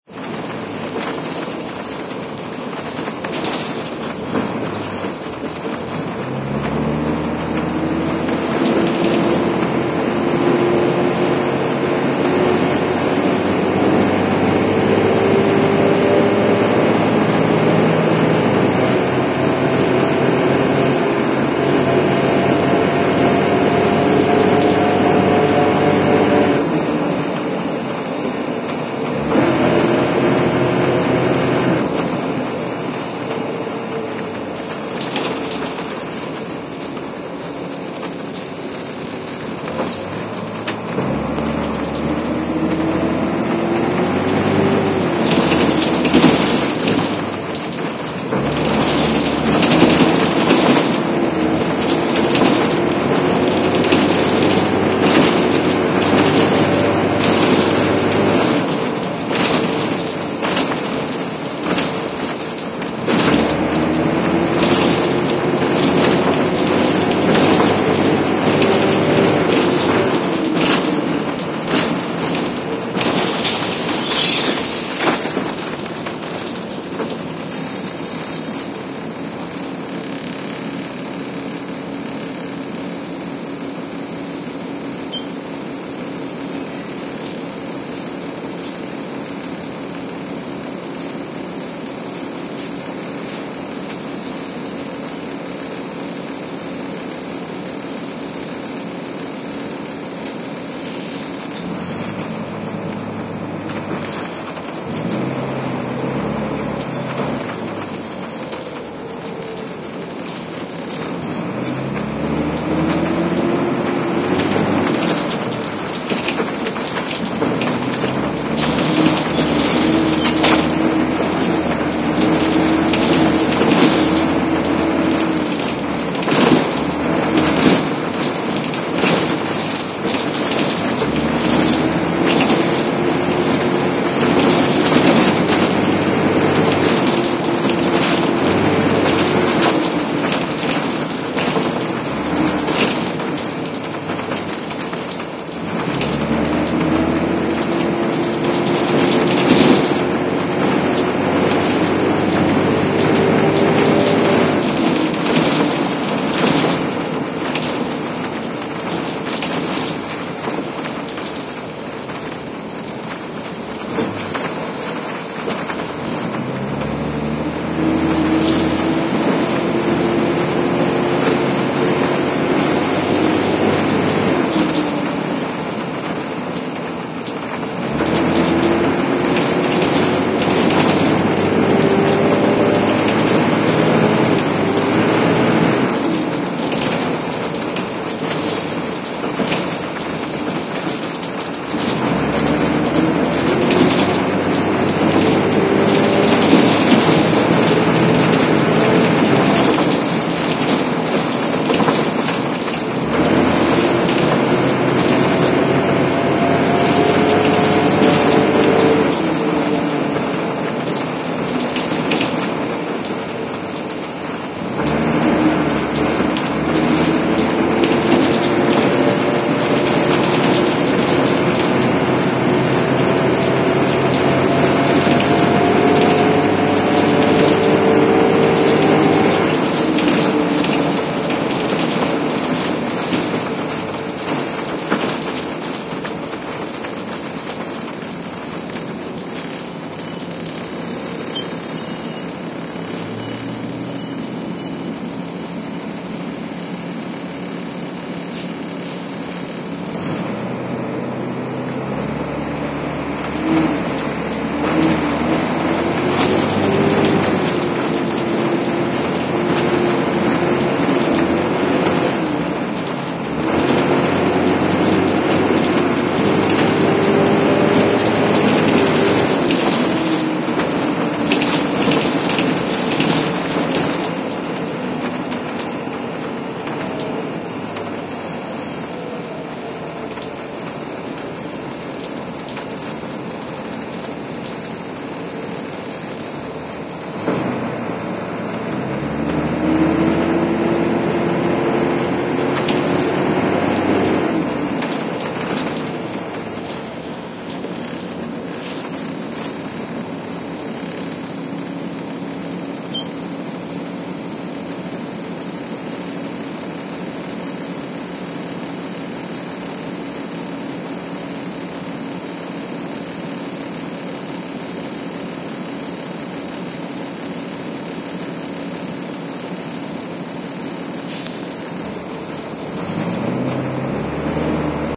Trolebús en la Noche
Grabación en un trolebús con poca gente.
route, parada, coches, trolley, el, mnibus, trolleybus